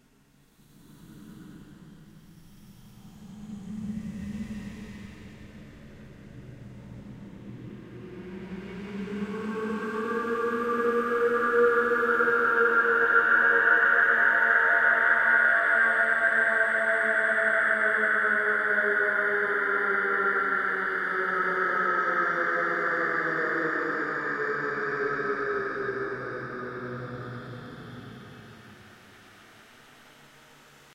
RAPHEL Gabriel 2017 2018 BONUS LaMortDeCthulhu
I recorded a grunt on which I set the Paul Stretch effect.
Typologie de Schaeffer :
V (Continu varié)
Masse : Son seul complexe
Timbre Harmonique : Terne
Grain : Lisse
Dynamique : la note monte crescendo
Profil mélodique : variation glissante
Profil de masse : site
agony, breathing, crying, cthulhu, dying, monster, underwater